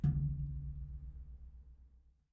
Metal container hit soft resonant
container, hit, Metal, resonant, soft